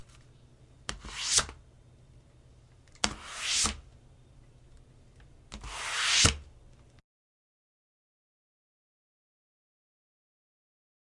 hard object sliding across desk
hard-object, slide, swoosh